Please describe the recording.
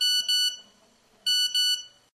Old default tone for cell / mobile phone receiving a text / sms message.
Have fun :¬)